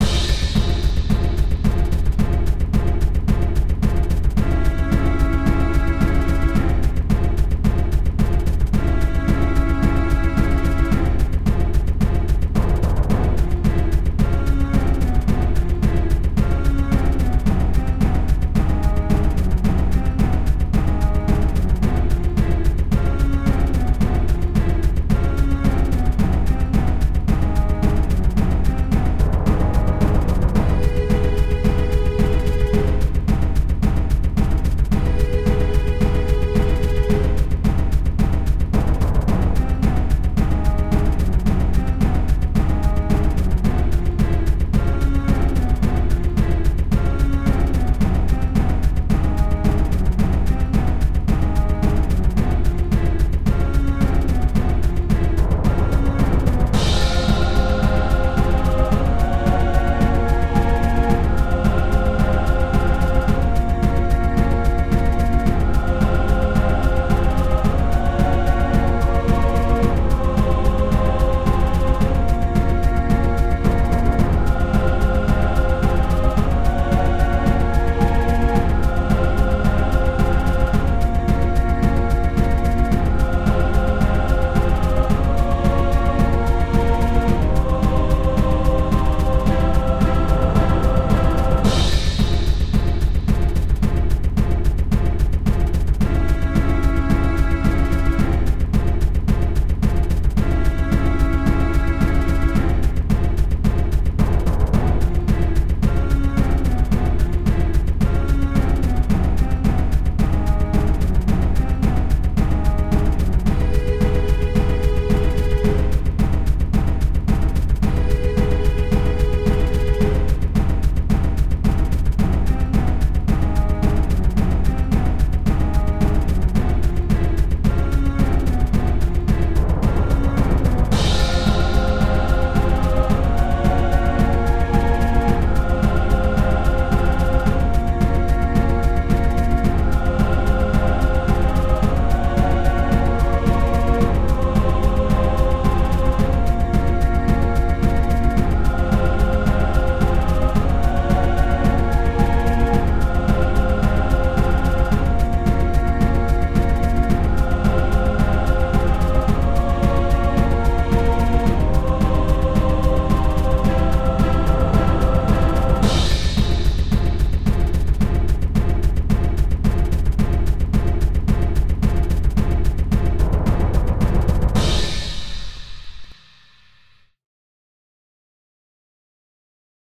Lurker of the Depths (Cinematic Music)
Made 100% on LMMS Studio.
Instruments: Strings, drums, brushes, choir, crash.
ACTION, ADVENTURE, CHASE, CINEMATIC, EPIC